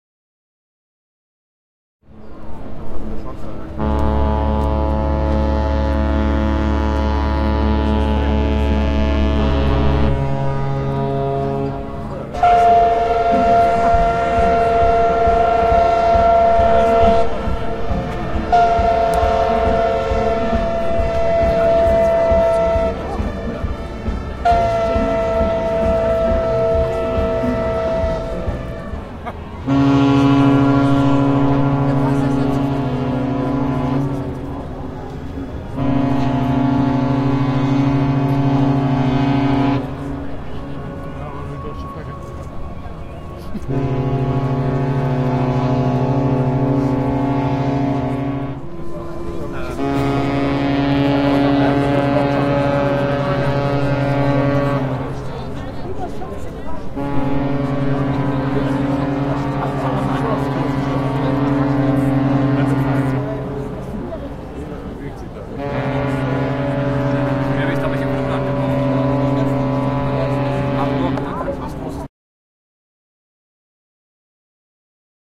Hamburg ship horns light
Some smaller horns I recorded at the Hamburg Port Anniversary 2013. Unfortunately a little bit muddled with people nearby me and police or fire horns.
hamburg, ship, horn, port